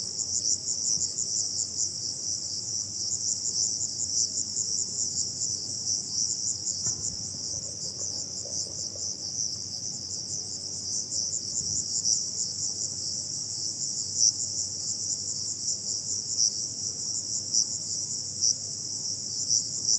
20060804.marshes.cricket01
crickets singing during the day in (summer-dry) Donana marshes, south Spain. Sennheiser ME62 > Sony MD > iRiver H120